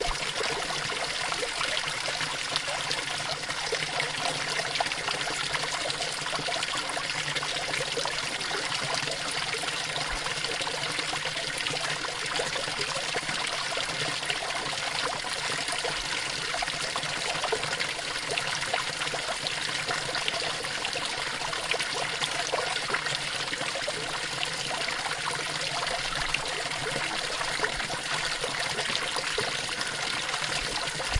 flowing water from a small fountain.
Field recording in the Dutch village of Stiens.
Recorderd with a Zoom H1 recorder.
ambient, babbling, brook, creek, field-recording, flowing, fountain, liquid, nature, river, stream, water